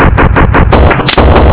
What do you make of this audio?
Loop-Glitch#01
loop, glitchcore, glitch